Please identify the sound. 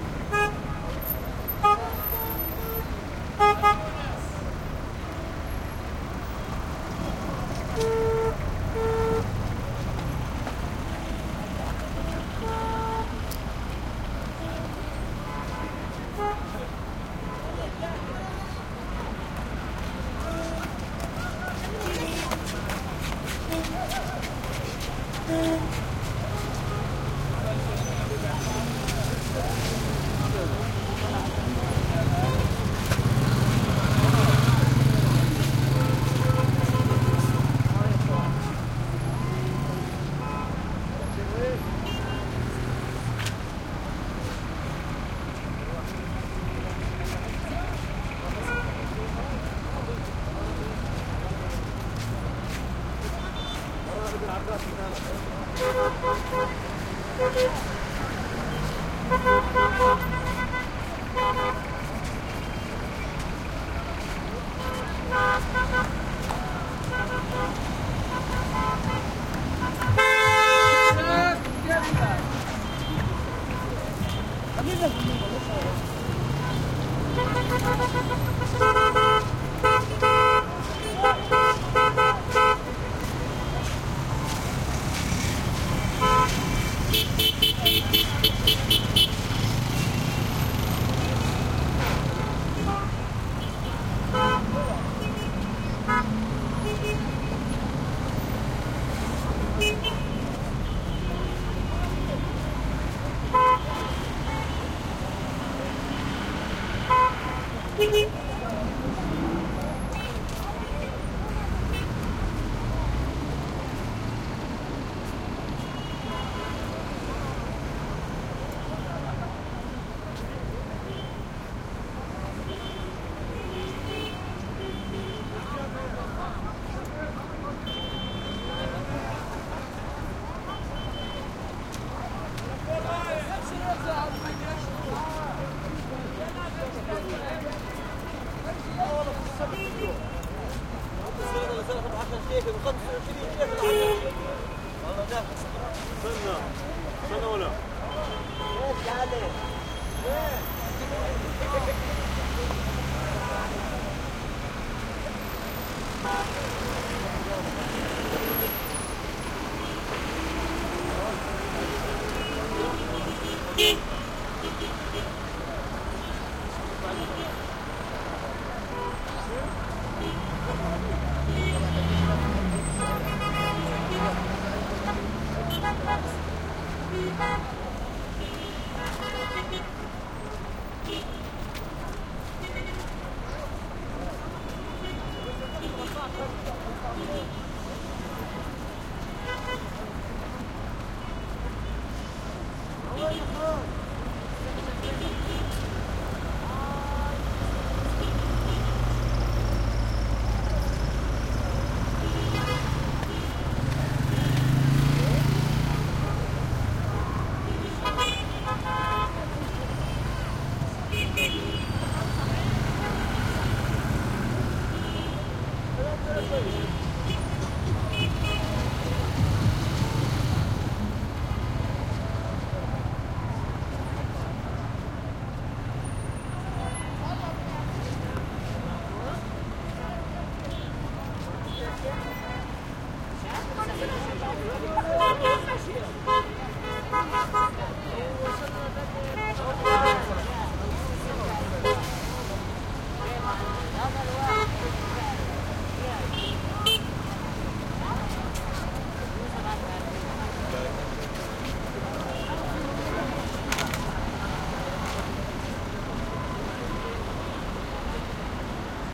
traffic medium Middle East busy intersection throaty cars motorcycles mopeds sandy grainy steps haze and horn honks1 people arabic Gaza Strip 2016
East, Middle, busy, cars, city, honks, horn, intersection, medium, mopeds, motorcycles, people, steps, street, traffic